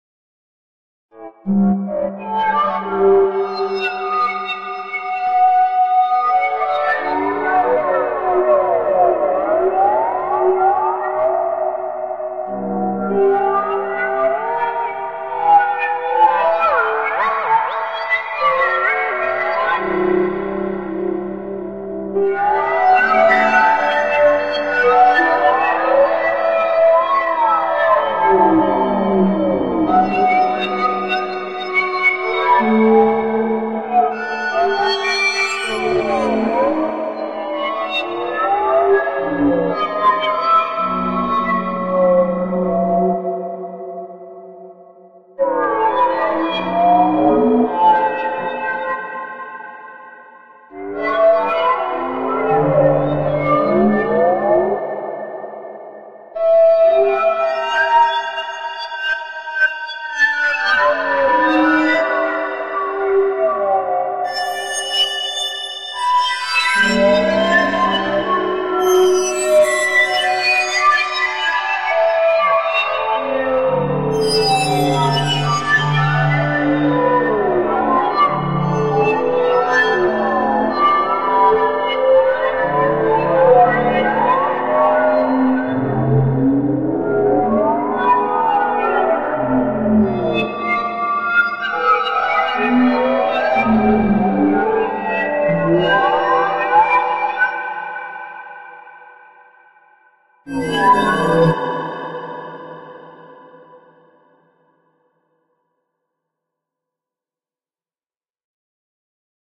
A lament from the suites for (future) cello unaccompanied, generated with a sense of regret in the soft synth Zebra.